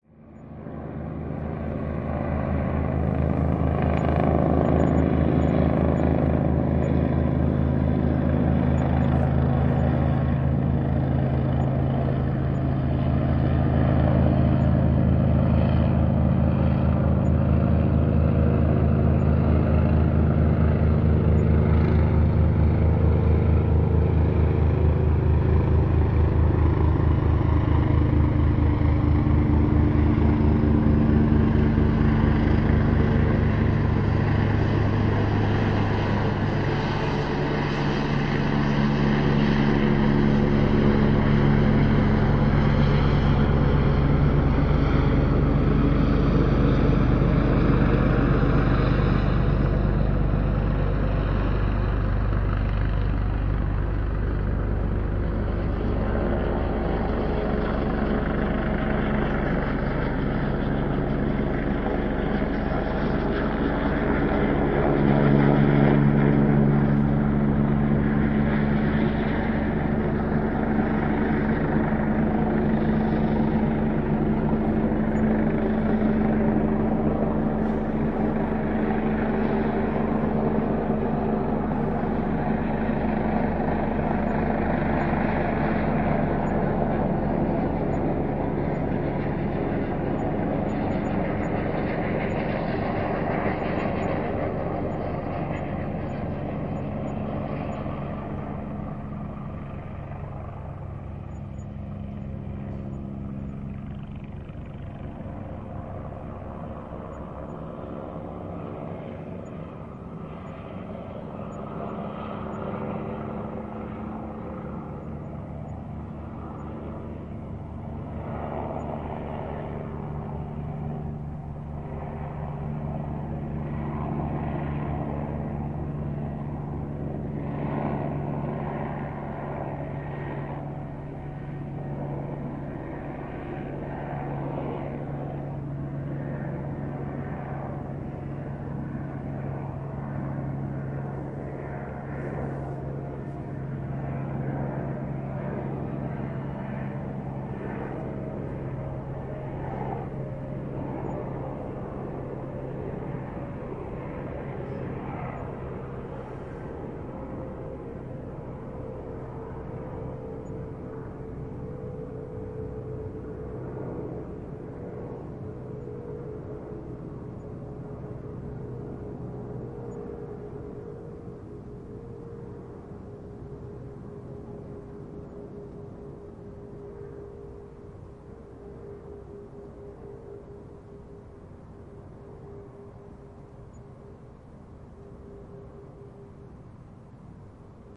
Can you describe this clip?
Police Helicopter Over London
Ambient recording from the ground as the aircraft flew over head.
helicopter, copter, chopper, flying, heli